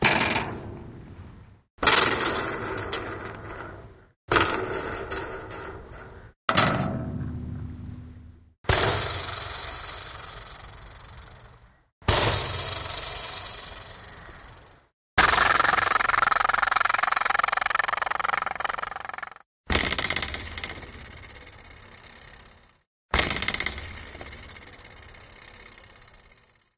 Wire explosions / vibrations
Metal wire on old country fence twanged to produce these sounds, but some evidence of rural environment in b/ground.
Look for my half-speed version too.
bang, explosion, industrial, metal, sci-fi, twang, vibration, wire